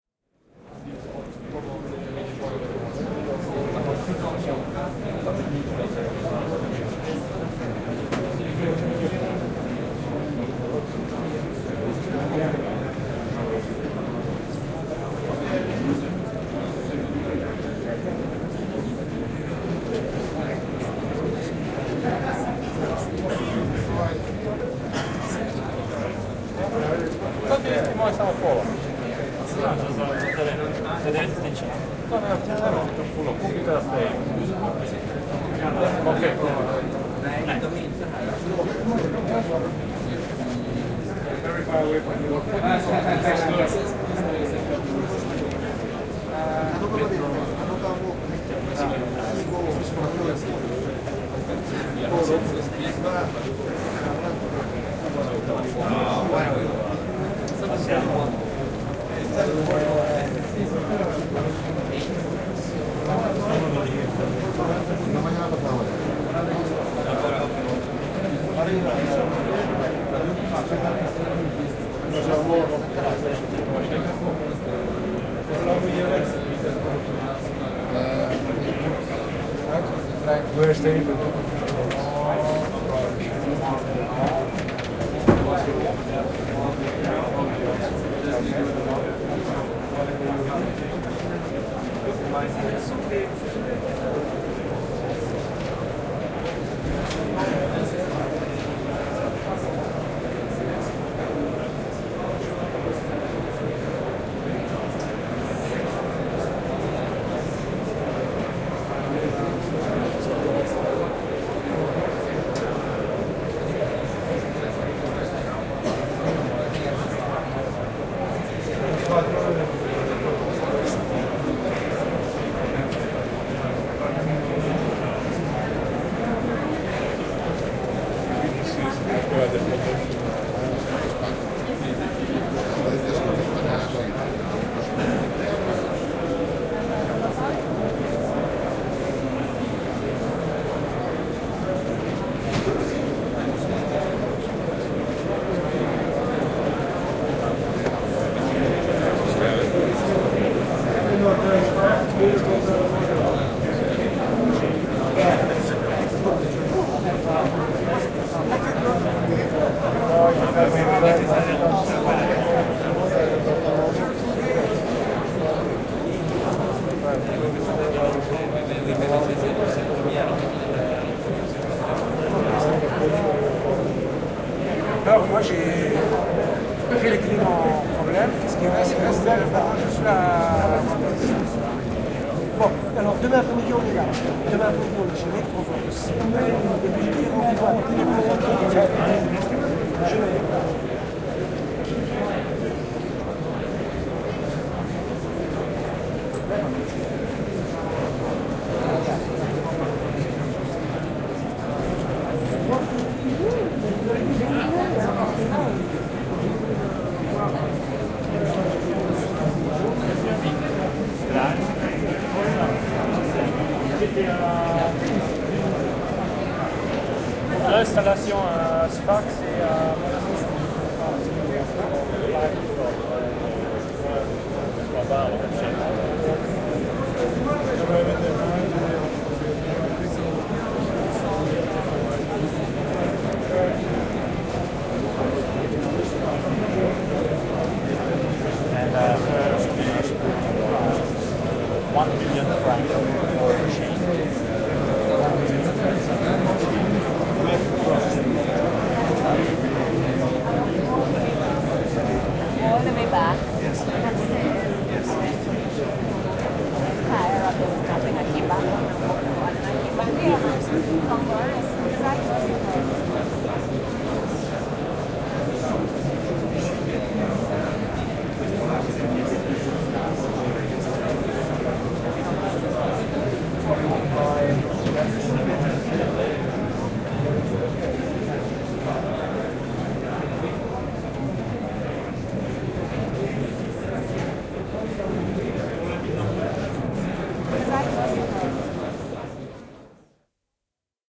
Monikielistä sorinaa isossa tilassa. Aula, ihmiset keskustelevat, jotkut välillä lähistöllä, taustalla tasaista puheensorinaa, naurahduksia.
Paikka/Place: Sveitsi / Switzerland / Montreux
Aika/Date: 06.03.1986